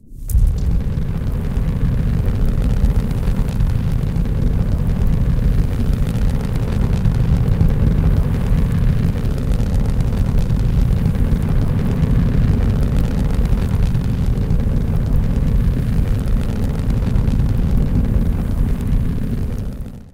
Fire -massive no cracking
Strong Fire sound with no wood cracking; like ruptured pipeline, rocket booster, flamethrower, large gas torch, plasma/energy beam/drill, etc.
Booster, Burning, Fire, Flame, Gas, Rocket, Torch